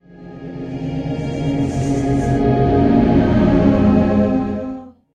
A reverse made upon angel01 originally from ERH Angels file
angel, voice